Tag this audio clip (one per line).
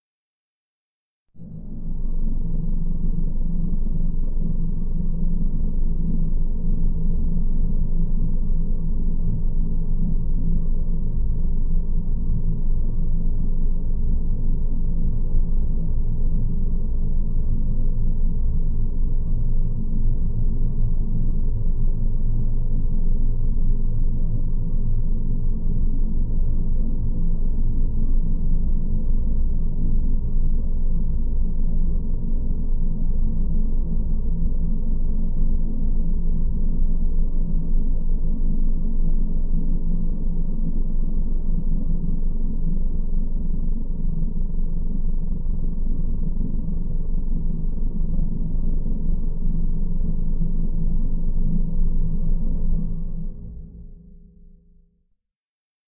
drive
energy
hover
Room
sci-fi
spaceship